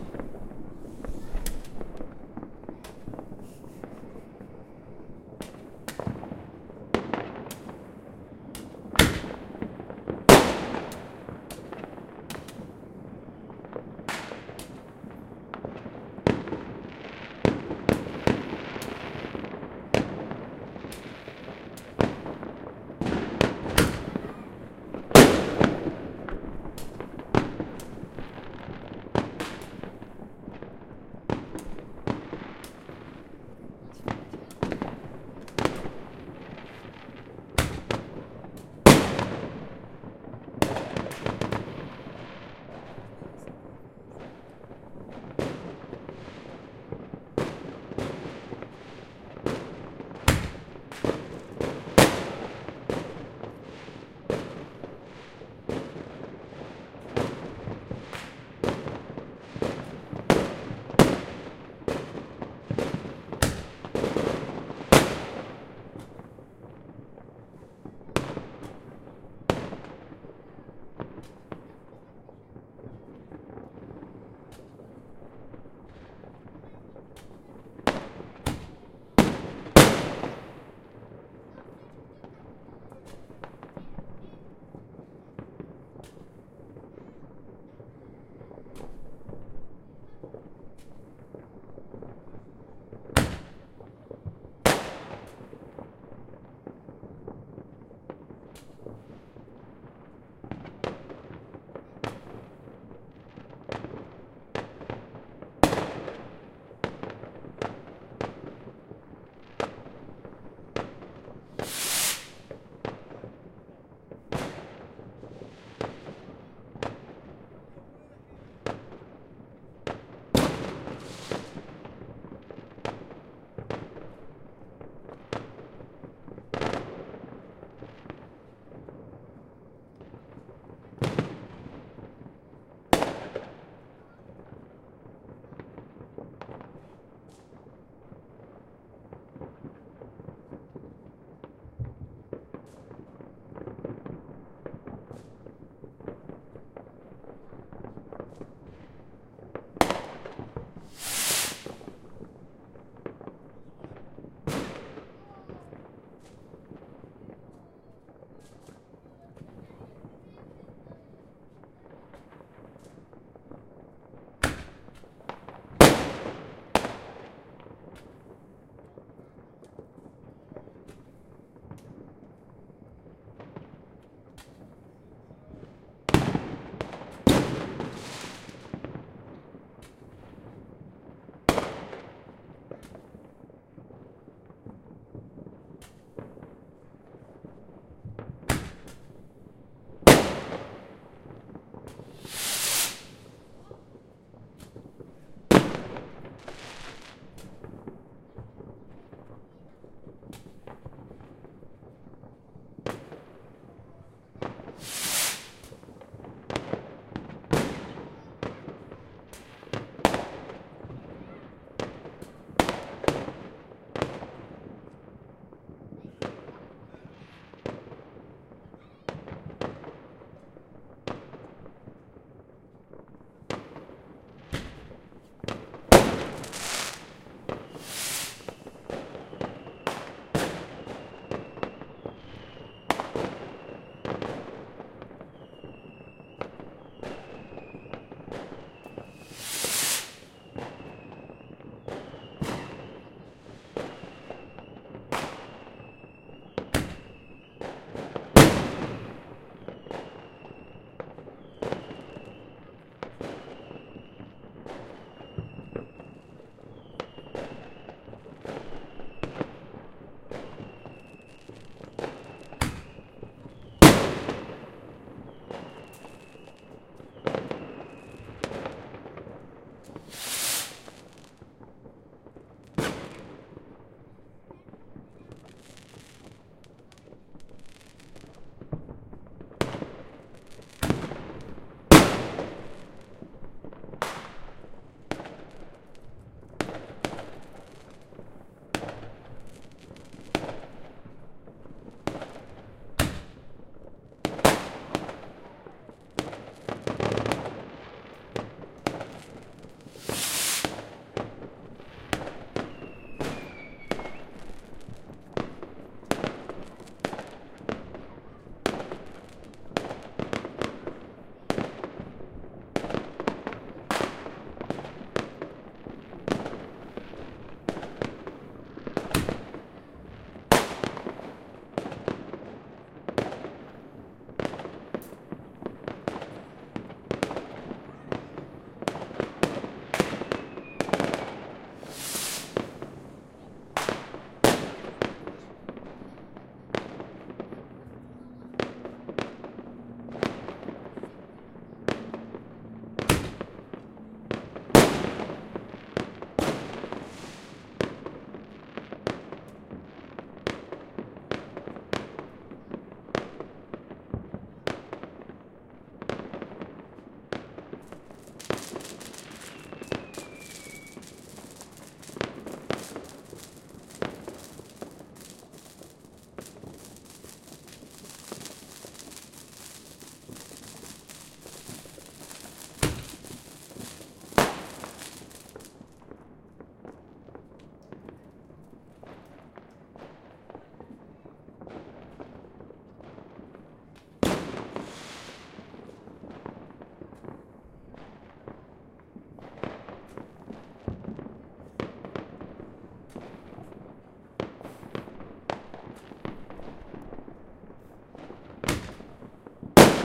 Fireworks New Year´s Eve
Midnight celebration of New Year´s Eve in a residential quarter of Prague. Fireworks, explosions, fire-crackers, rockets, loud sounds. Ambience. Recorded by Zoom H4n and normalized.
celebration, Eve, explosion, fire-crackers, firecrackers, fire-works, fireworks, New, rocket, s, year